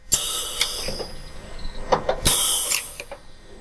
Pneumatic sound effect of screen printing machine
Sound of the pneumatics of a screen printing machine.
industrial, pneumatic, pneumatics, screen-printing, sound-effect